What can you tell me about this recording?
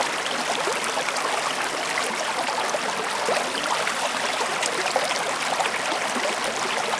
Water Feature (Can Be Looped)
Multiple layers of water sounds used to create one overall water feature sound. Can be looped without error.